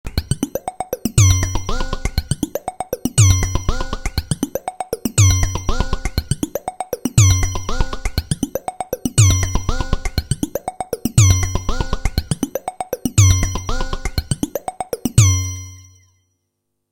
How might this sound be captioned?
Made on a Waldorf Q rack